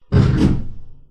Drop Chair
The sound of an office chair being dropped about 3 feet to the floor. It's got a mechanical sound to it that could be used to represent working machinery, like a printing press or factory belt.
bang, crash, drop, machinery